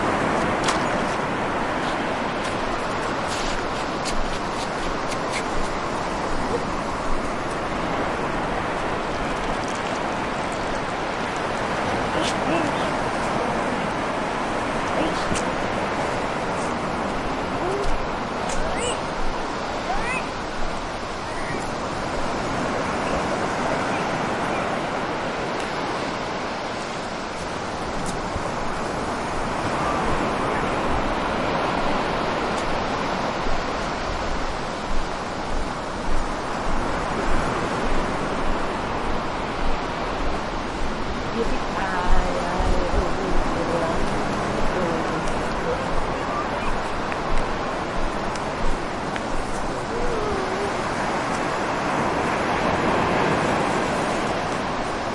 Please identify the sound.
bolivar waves and stan
waves at bolivar peninsula with my son stanley in the distance
beach, boy, child, coast, human, ocean, sea, sea-shore, seashore, seaside, shore, surf, tide, water, wave, waves